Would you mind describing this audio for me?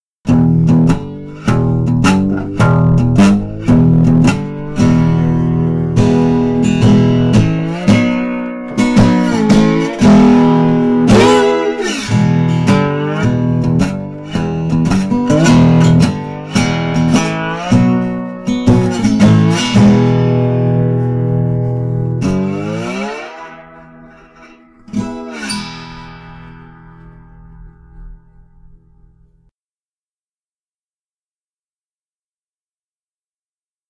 Short melody played with a guitar.
Recorded with an Alctron T 51 ST.
{"fr":"Guitare 2","desc":"Une courte mélodie jouée à la guitare.","tags":"guitare acoustique musique instrument"}
instrument, acoustic, guitar, music